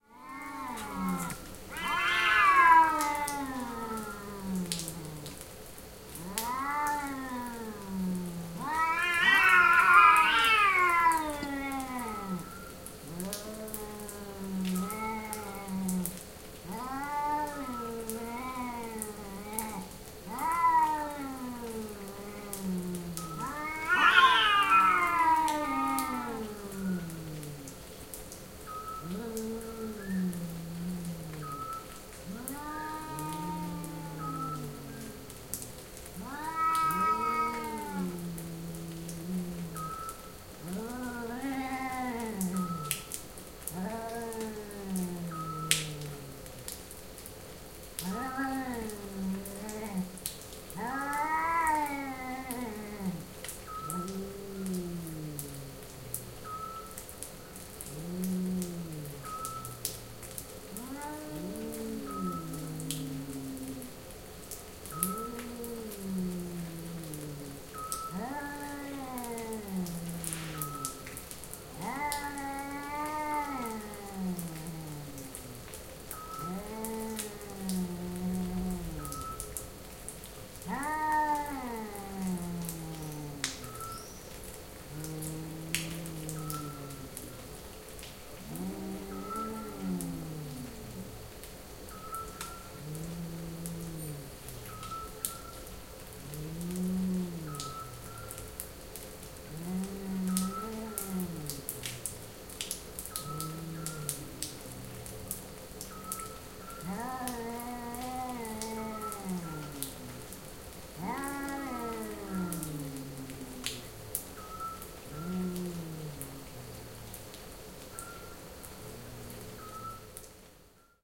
Son de deux chats en chaleur. Son enregistré avec un ZOOM H4N Pro et une bonnette Rycote Mini Wind Screen.
Sound of two cats in heat. Sound recorded with a ZOOM H4N Pro and a Rycote Mini Wind Screen.
field-recording, feline, meow, domestic, nature, growling, cats, cat, animals, cat-in-heat, purr, pets, heat, purring, animal, pet